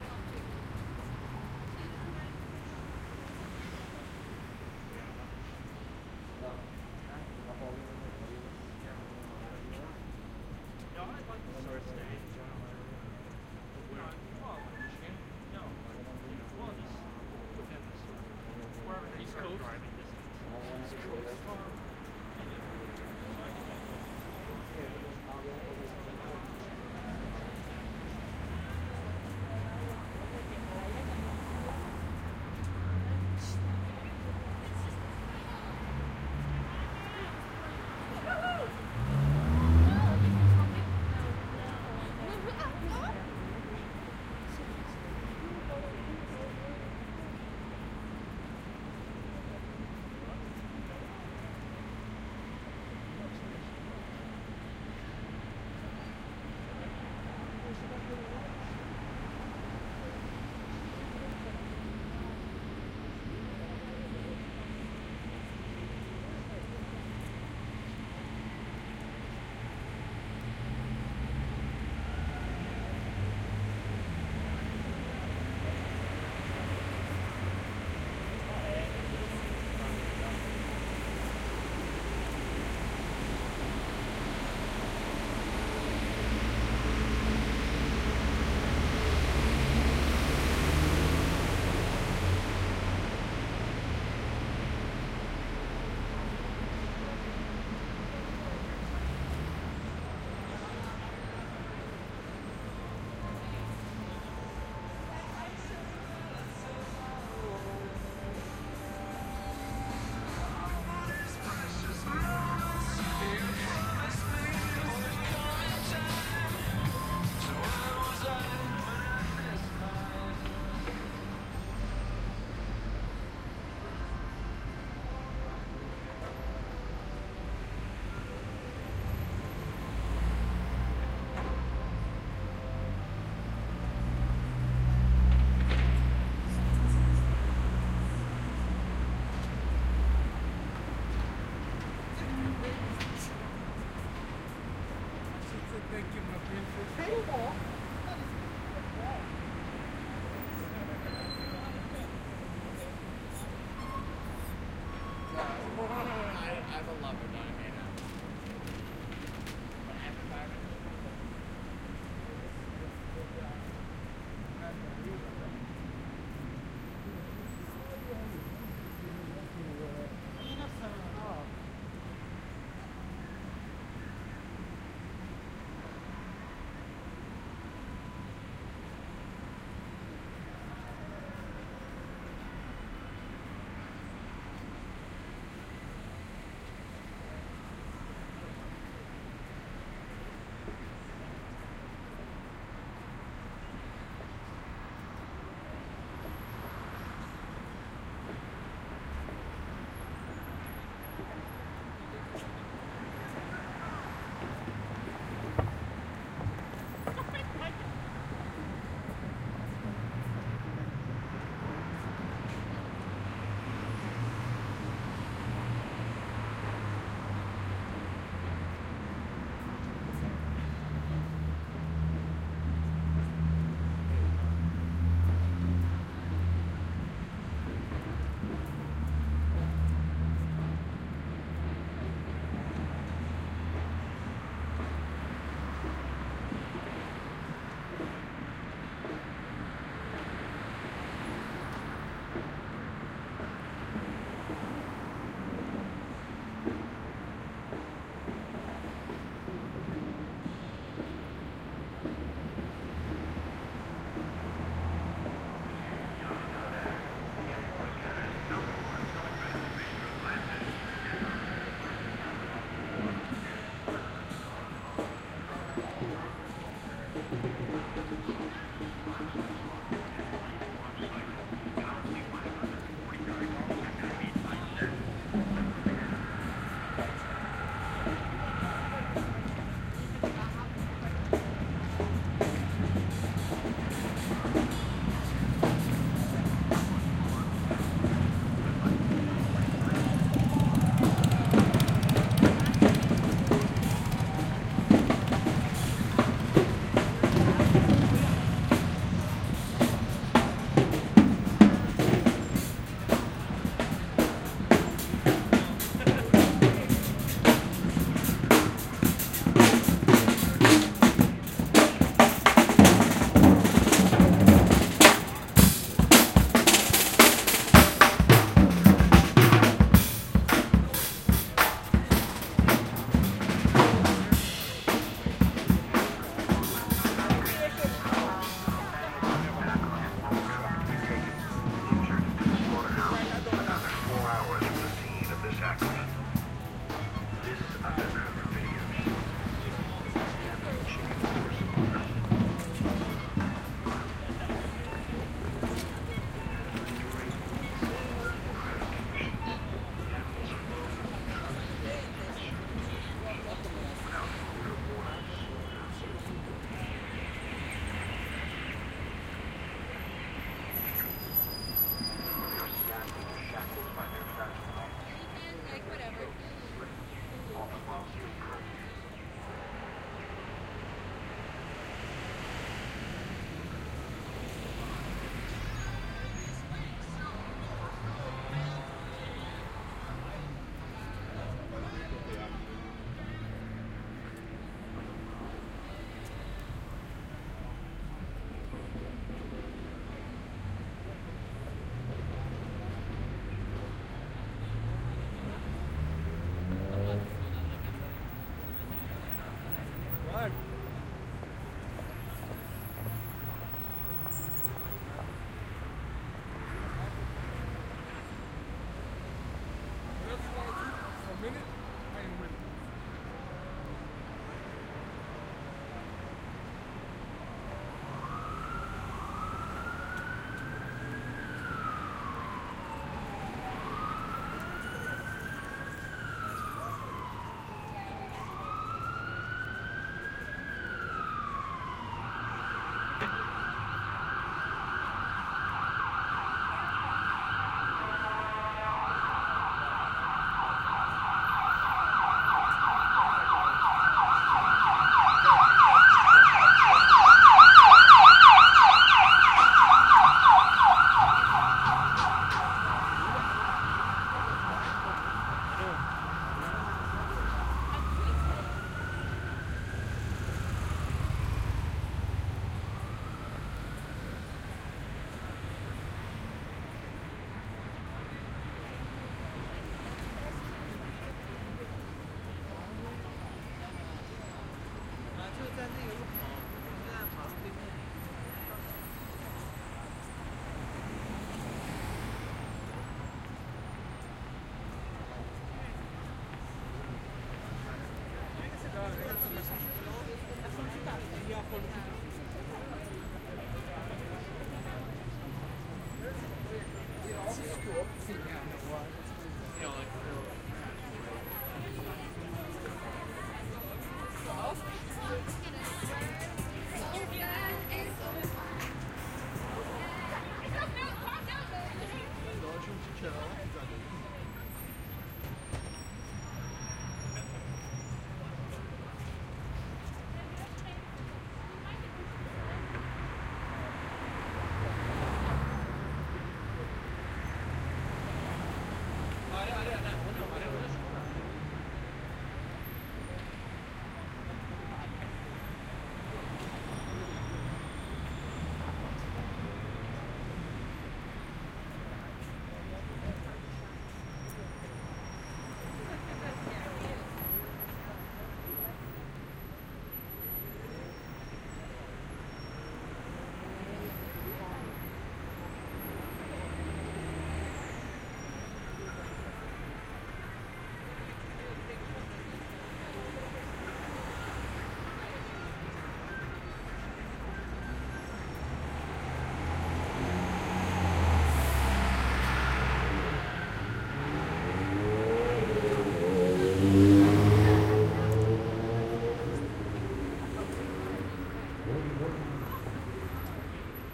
yonge street 01
Walking North on Yonge Street in Toronto. This is a popular shopping area and despite being late at night it was rather busy. At about 4:40 I walk by some street performers playing drums.Recorded with Sound Professional in-ear binaural mics into Zoom H4.
people, city, outside, traffic, toronto, crowd, phonography, field-recording, binaural, street, noise, canada